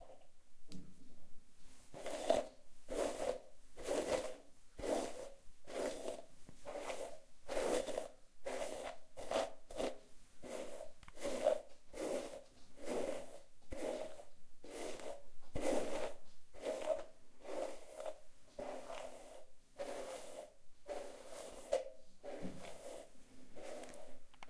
Martina in bathroom combing her wet hair with a hair brush.
Part of Martina's Evening Routine pack.
Recorded with TASCAM DR-05
Signed 16 bit PCM
2 channels
You're welcome.